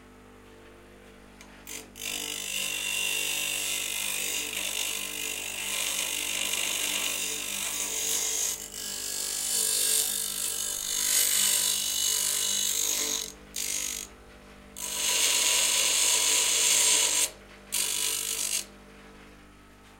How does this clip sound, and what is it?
grinder on metal
the sound of a table grinder grinding a piece of steel
recorded with a zoom h6 stereo capsule
machinery, metal, industrial, mechanical, grind, noise, steel, grinding, OWI, sanding, workshop, grinder, factory, machine